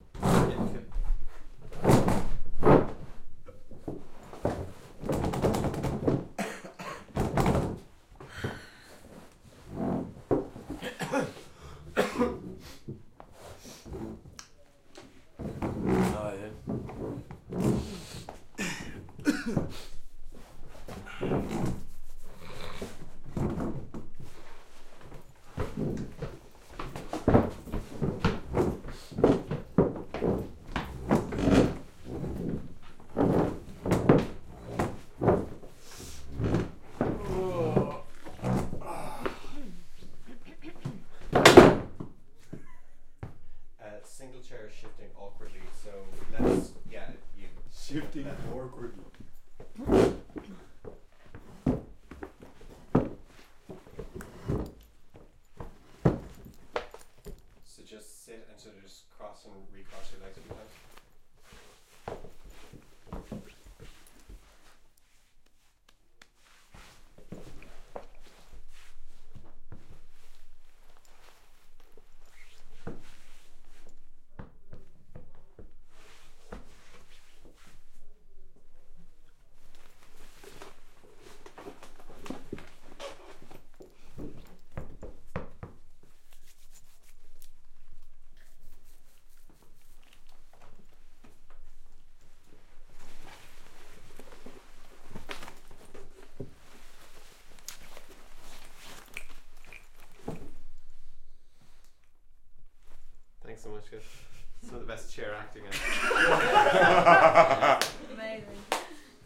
Chairs Dragging Across Stone Floor
Number of chairs being dragged across a hard floor in an otherwise quiet room. Ideal for subtle classroom atmos.